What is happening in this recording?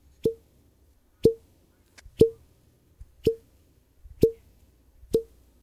Olive oil bottle pop
The top being popped off of a bottle of olive oil. I recorded it six times and cut out most of the empty space in between each one. Sounds like a cork coming out (but it's just plastic).
Kind of surprised that I didn't need to low-pass it afterward, given how much movement was involved so close to the mic.